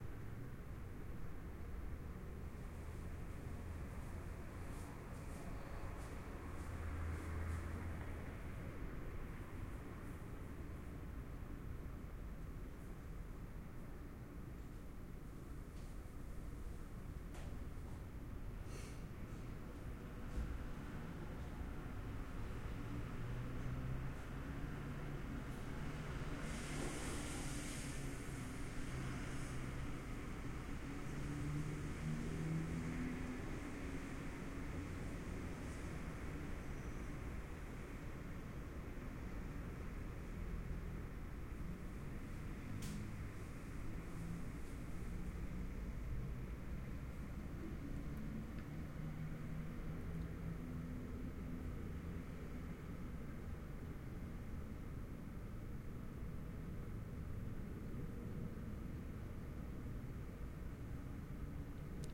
Traffic humming + Construction
Traffic humming with construction noises. Recorded with Tascam DR-03
soundscape, traffic, construction, background